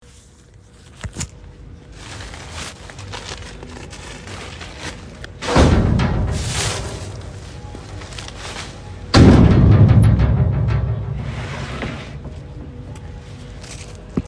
Rubbish being thrown into a dumpster
Just the sound of rubbish being thrown into a fairly empty dumpster, has a nice boom.
Field, Free, Recording, booming, metal